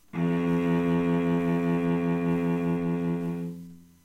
A real cello playing the note, F2 (2nd octave on a keyboard). Sixth note in a chromatic C scale. All notes in the scale are available in this pack. Notes, played by a real cello, can be used in editing software to make your own music.